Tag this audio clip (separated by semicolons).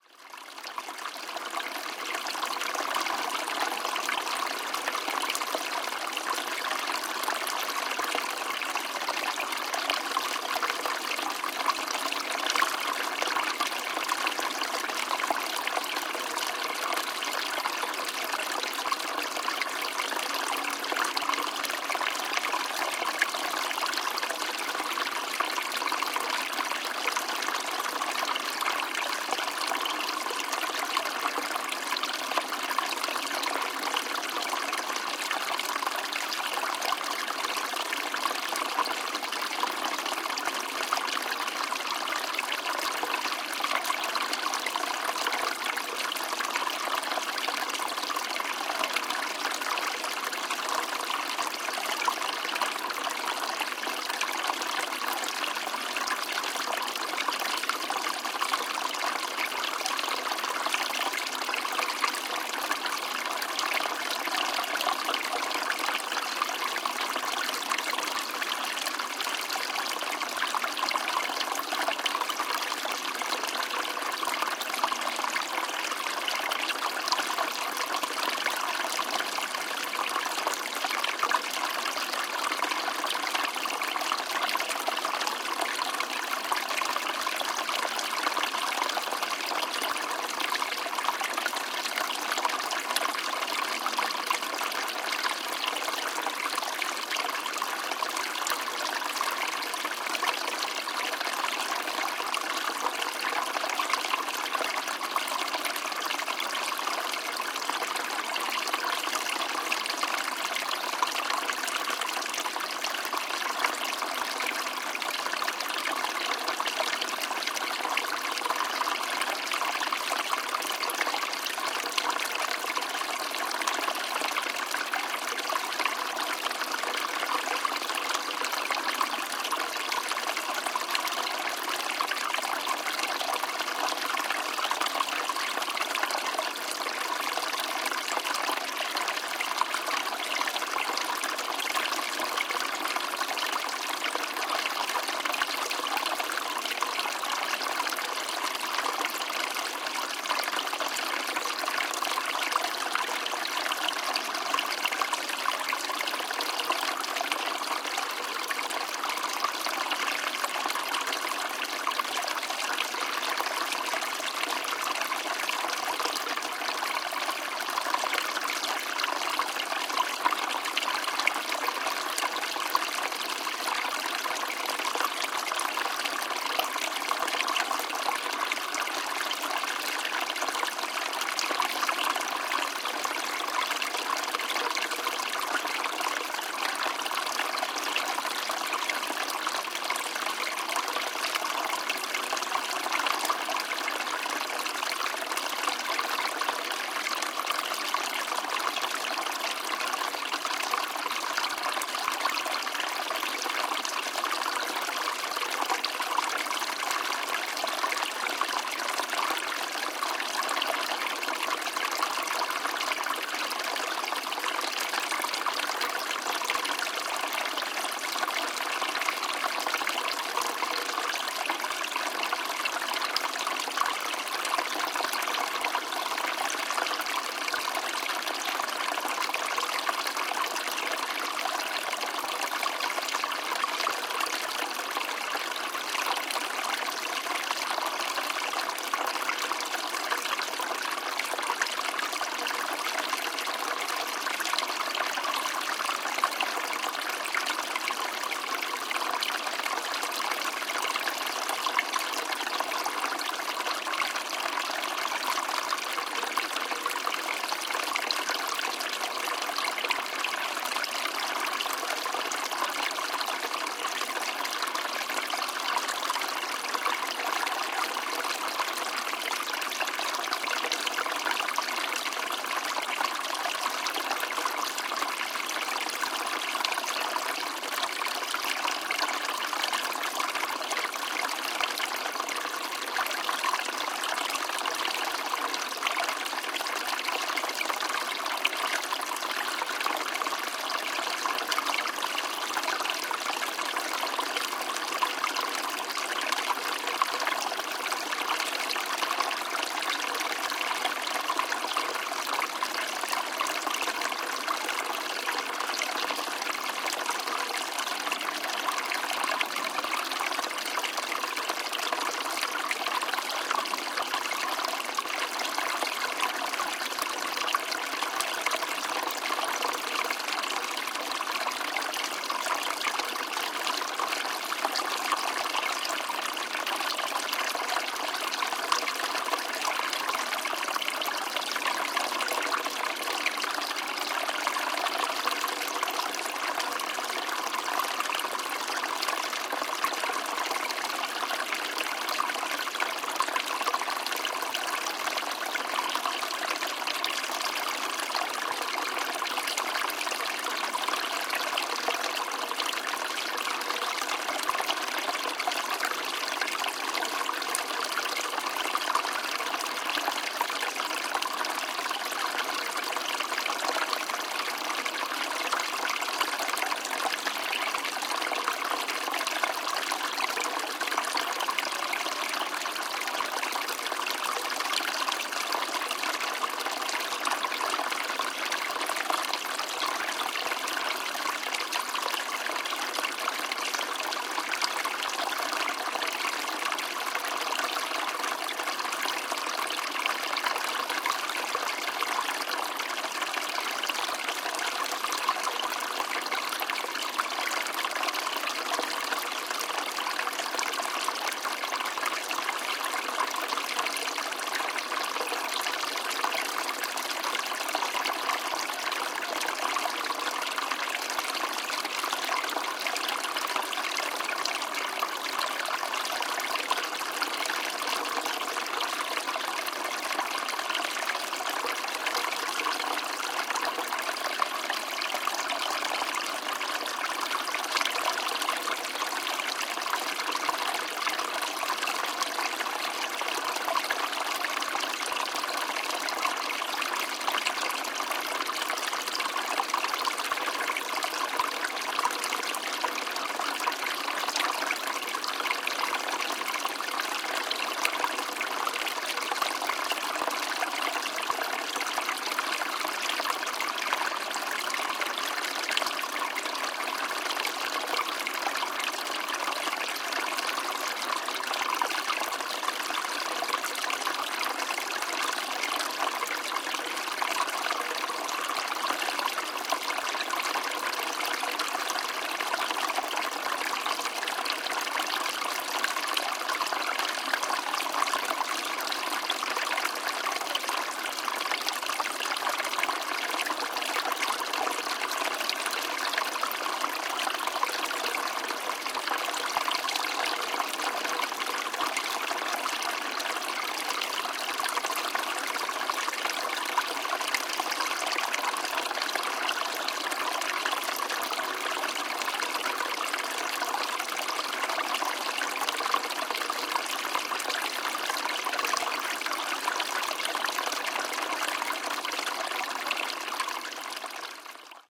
ambient; stream; flowing; forest; flow; relaxing; water; nature; brook; river; field-recording; creek; babbling; trickle; soundscape